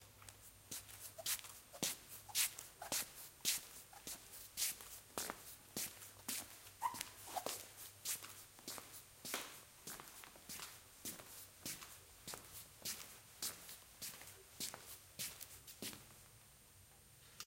old man walking
Me walking like an old man in the hallway of a big school building with my shoes rasping on the floor. OKM binaurals, preamp unto Marantz PMD671.
floor, man, old, rasping, walking